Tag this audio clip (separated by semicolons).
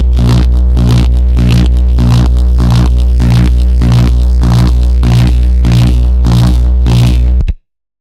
synth; modified; bass